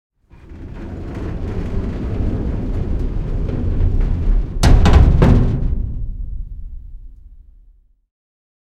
thin metal sliding door close shut
thin metal sliding door closing shut normal